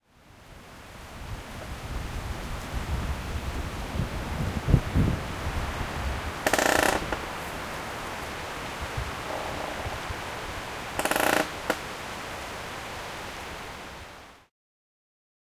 Creaking Tree in Liwa Forest
Short sound of the creaking tree taken in Liwa Forest, Masuria, Poland.